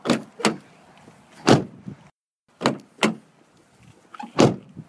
Opening and closing a car door
Opening and closing the passenger-side door of a 2007 Toyota Camry.
Camry, close, closing, door, opening